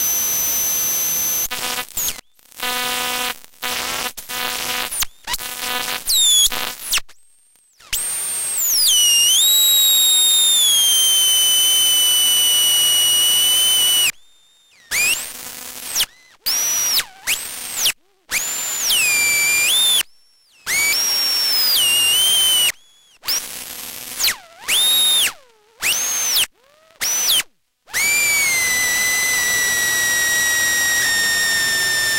A cheesy AM/FM/TV/CB/WEATHERBAND radio plugged into the dreadful microphone jack on the laptop out on the patio.